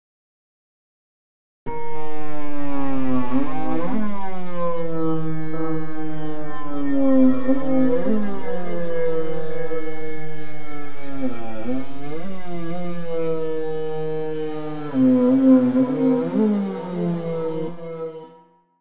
Made in Reaktor 5.
atmospheric, weird